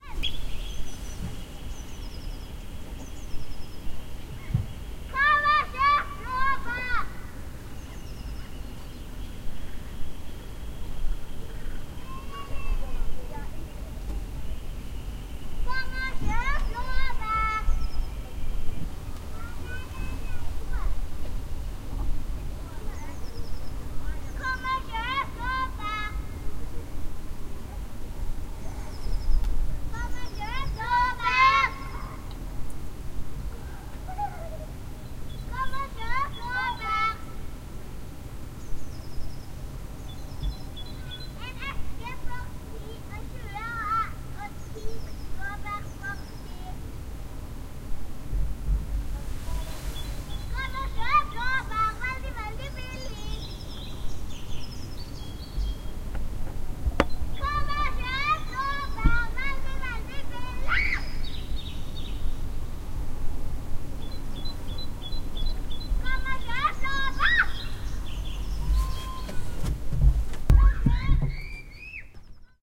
Sounding play
recording of children playing from other side of fjord, close to Molde, Norway; afternoon June 24
play,children, norway, summer_afternoon
play, children, summer, afternoon, norway